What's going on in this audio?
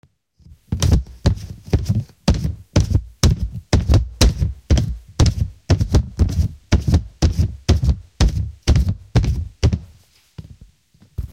pasos plástico
dar pasos en un plastico con pies descalzos